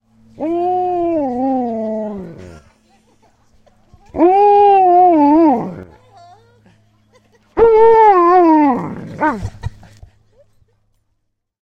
Igor Three Howls
Three howls from our Alaskan Malamute, each gaining in intensity. Recorded outside with a Zoom H2 using the internal mics.
dog, howl, husky, malamute, wolf